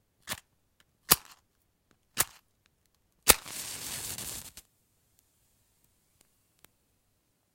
Match - Strike and Light 01
Match, fire, flame, light, matchbox, strike